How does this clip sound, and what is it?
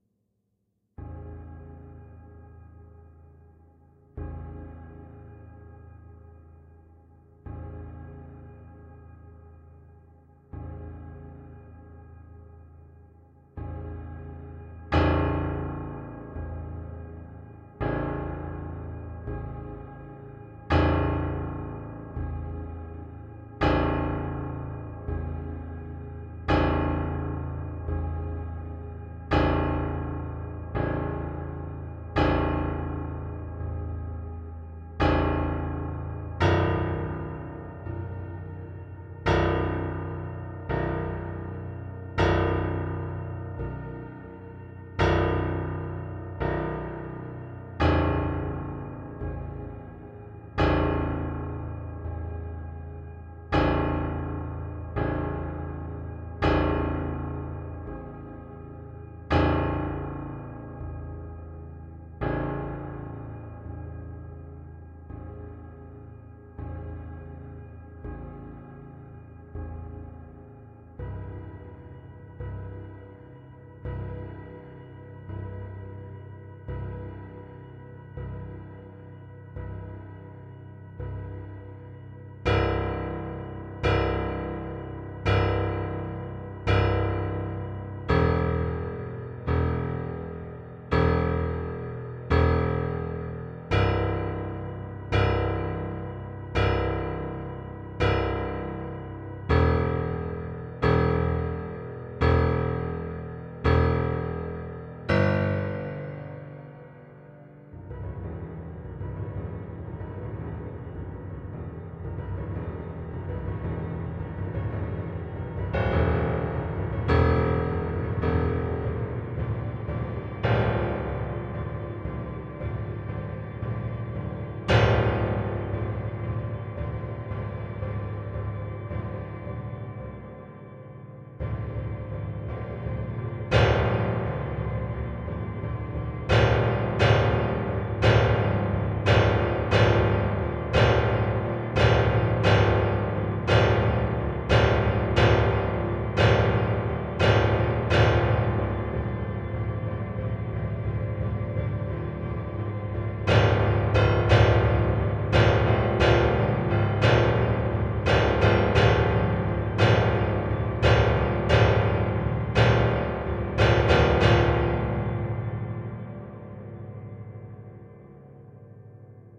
Dark Thriller piano #1
Dark, 1, tragic, thriller, darkness, evil, murdered, piano